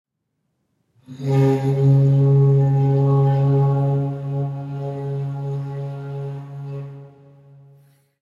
Long Distant Foghorn
A long single foghorn sound, made by blowing into a 10-foot-long PVC pipe in a fairly big, empty room. Sounds surprising like a real foghorn in the distance.